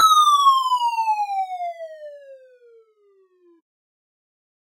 A falling or dropping sound.
bleep, fall, drop, bloop